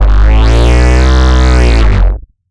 Screaming Bass
I recorded my voice, trying to imitate a modulated sub, and ended with that after some processings.
low, screaming, bass, sub, dubstep